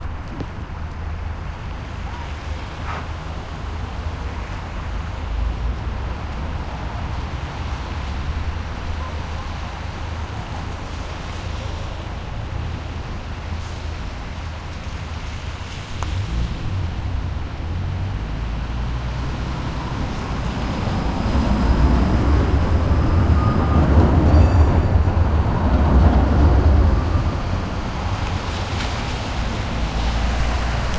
Tramway arrives on station on busy street